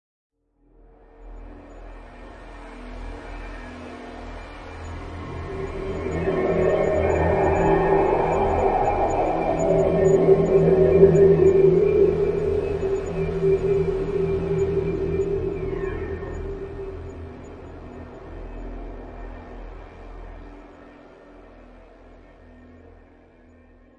Single note "E" made with Absynth and ES1 (Logic), mixing with various reverb effects and frequency modulation.